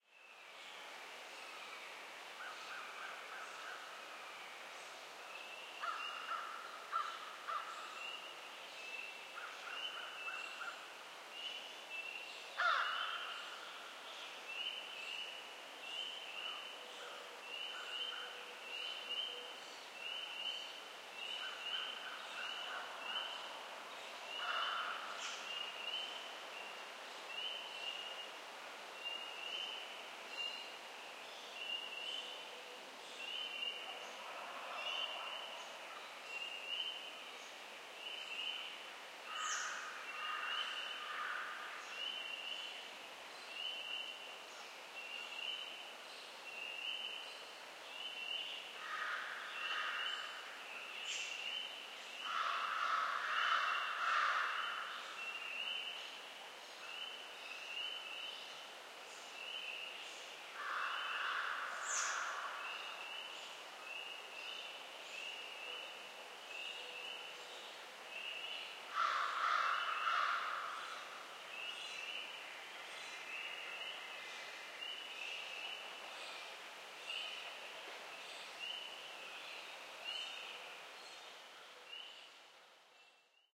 CT Dawn Birds
Woodland birds just after dawn in Central Connecticut forest. Bird background with sporadic crow calls. Recorded with Shure SM81 coincident pair/Amek 9098 DMA preamp.
forest, frogs, insects